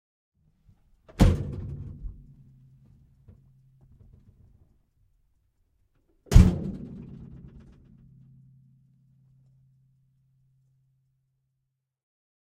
1950 Ford Mercury interior door slam x2
Recorded on Zoom H4N with Rode NTG-3.
The sound of both doors on a vintage 1950 Ford Mercury car slamming shut recorded from inside.
vehicle, automobile, auto, car, slam, door, ford, hotrod, 1950, vintage, mercury, 50s